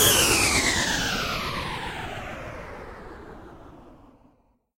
Engine stop 04
Stretch factors: 1,1
Time resolution (seconds): 0,05
electric-engine, house, diesel-engine, vacuum-cleaner, electronic, machine, technical-sound